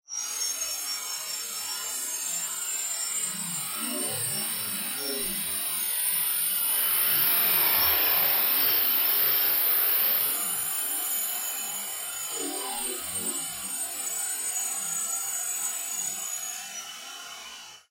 Long spacey metallic processed breath sound with active spectral envelope.